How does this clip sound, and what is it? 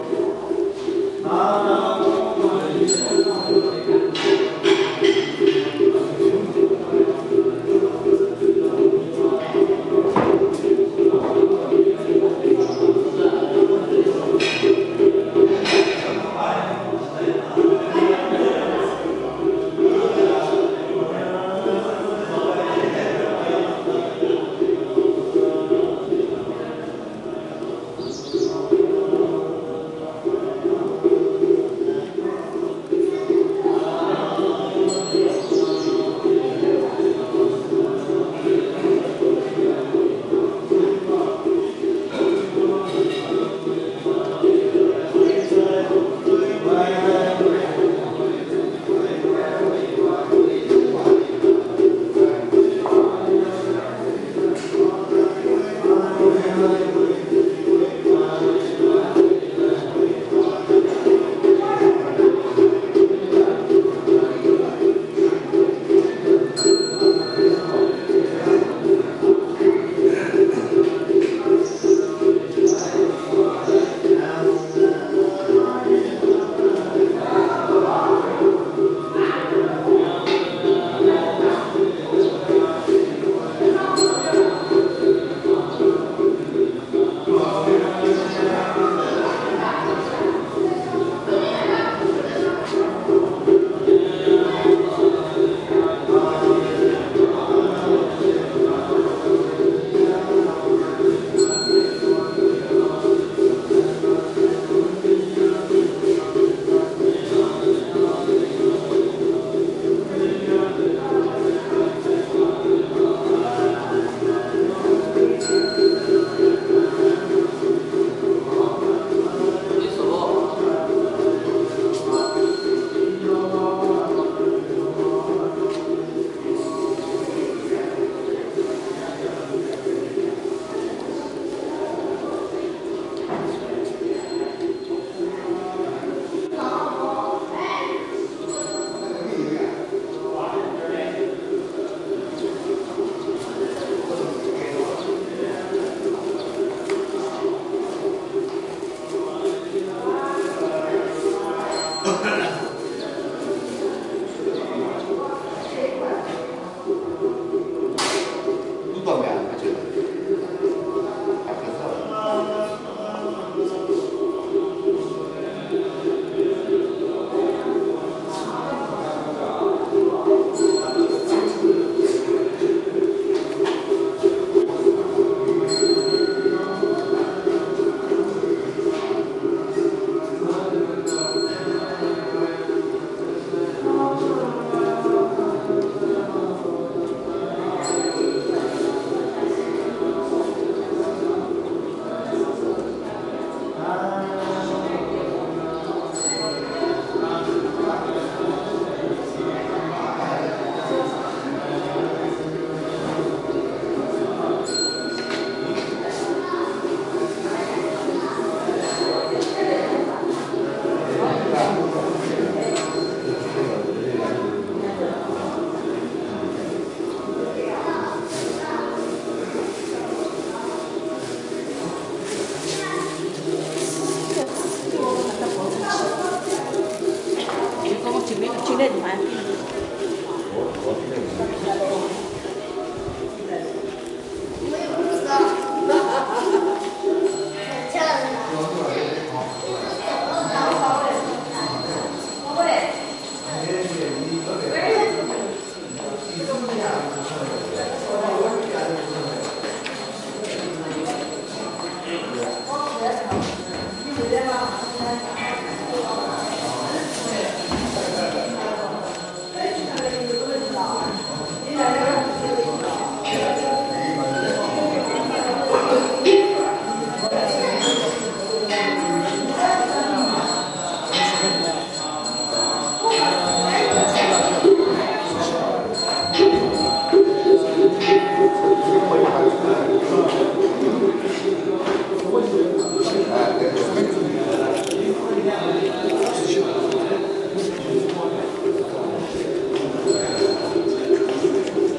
Monks Chanting in Jade Buddha Temple, Shanghai
Field recording of monks chanting at Jade Buddha Temple, Shanghai. Recorded on my Canon D550.